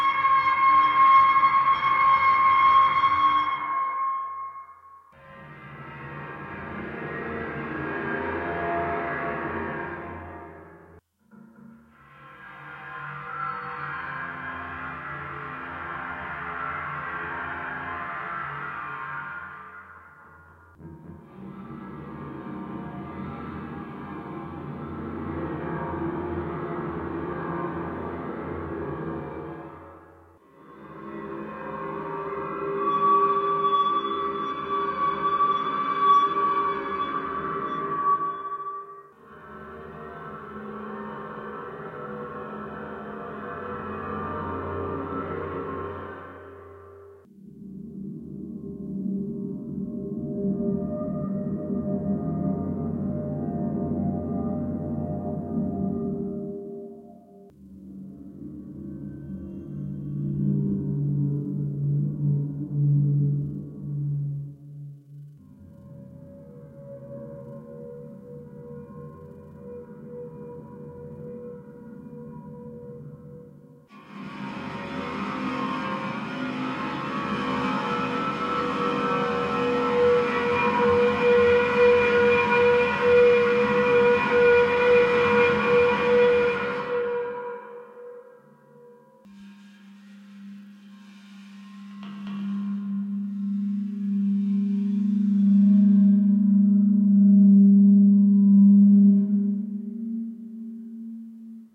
A reel of bowed cymbal sounds for the Morphagene.